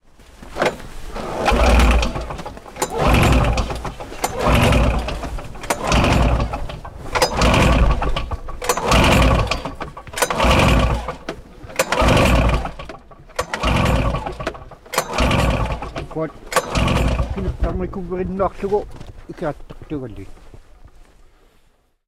snowmobile won't start false starts lawnmower pull cord
false, starts, pull, snowmobile, lawnmower, cord